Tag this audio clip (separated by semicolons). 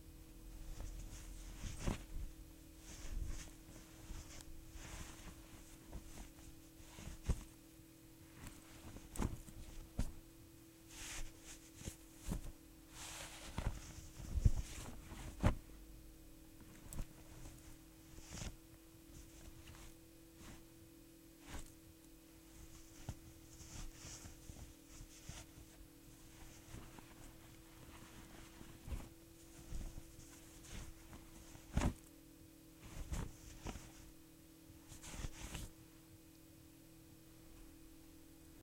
cloth; foley; motion